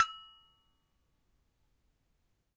Sample pack of an Indonesian toy gamelan metallophone recorded with Zoom H1.
gamelan, percussive